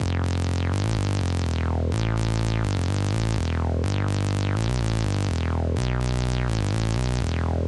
Fake Moog
This is a Moog style bass created using Native Instruments Monak.
Electric-Dance-Music, Music